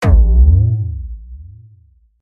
jelly kick
Kick with heavy flanger
bass-drum, bassdrum, bd, flanger, jelly, kick